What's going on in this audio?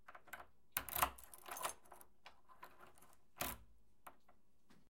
Keys Unlocking Door
Inserting key into lock and unlocking.
lock, unlocking, front, unlock, door, key, keys